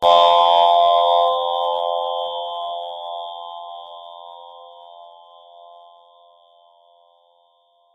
Voice sound, created on computer.
electronic, sample, synth, voice